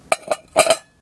Rolling Can 03
Sounds made by rolling cans of various sizes and types along a concrete surface.
steel, can, tin-can, rolling, tin, aluminium, roll